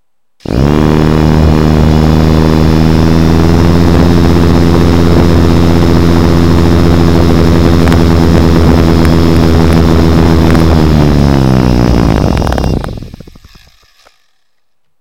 RC Helicopter Wind Blowing
Remote Control Helicopter Wind Blowing into Microphone
field-recording, Engine